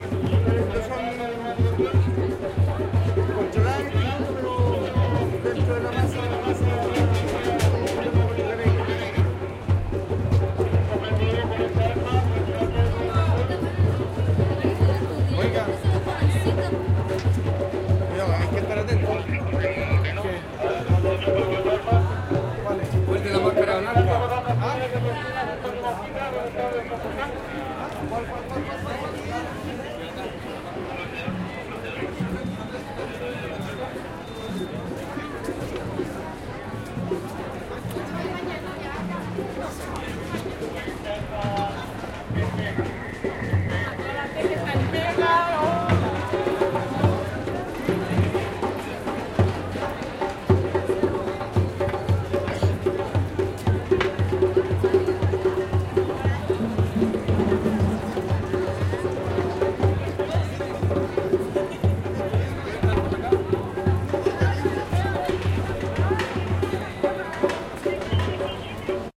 paro salud confusam 03 - tambores y carabineros
Unos carabineros se organizan por radio mientras suenan los ultimos tambores, en esquina mc iver con monjitas
crowd, people, gente, protesta, chile, policia, nacional, calle, ministerio, pacos, confusam, protest, street, salud, santiago, strike, paro, cops, carabineros